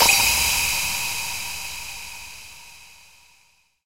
this series is about transition sfx, this is stacked sound effects made with xsynth,dex and amsynth, randomized in carla and layered with cymbal samples i recorded a long time ago
transition, woosh, cymbal, white, hit, sfx, crash